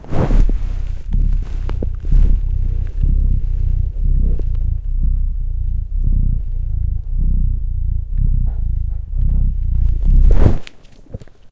DEEP INSIDE Creature
CAT, IN-OUT, CINEMATIC